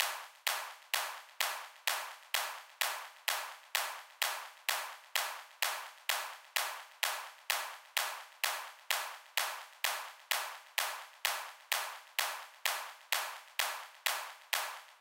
Basic Clap-Loop
Basic clap loop with some delay.